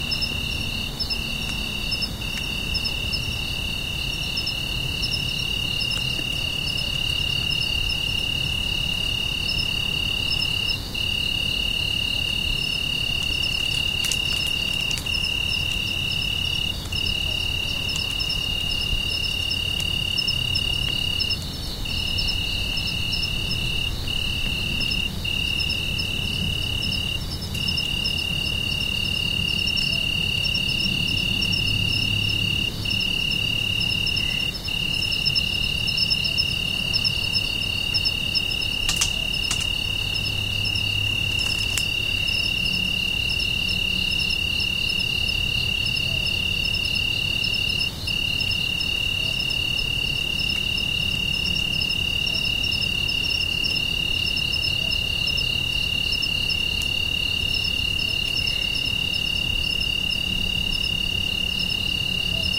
Woy Woy Nightlife
Sounds of the natural nightlife in Woy Woy, NSW, Australia. Crickets, birds and atmospheric background noise. Recorded on Olympus LS10, sometime around midnight